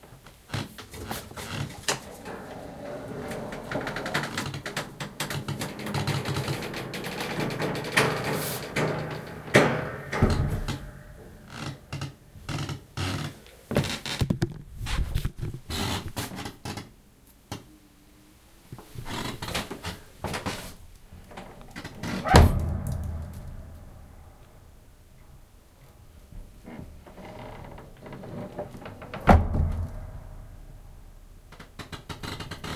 Attic Door Springs 1
The springs on this ceiling door were super metallic so I wanted to record them, sorry for any time I touch the mic!
wood, resonant, squeak, thump, metal, door, foley, creak, close, attic, metallic, springs